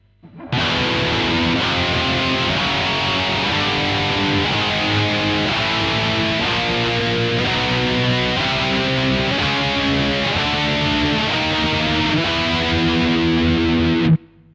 12 first electric guitar power chords
From E to E. Note-5th-8th (Power chord)
Guitar, Electric